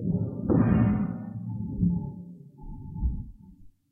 tin plate trembling
tin, plate